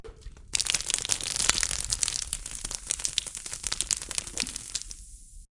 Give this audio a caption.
pouring soda
Field-recording of can with natural catacomb reverb. If you use it - send me a link :)
ground, pouring